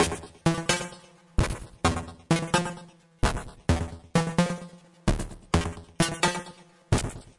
another synth loop!!
hardcoded, dirty, sequencer, acid, empty, Renoise, synth1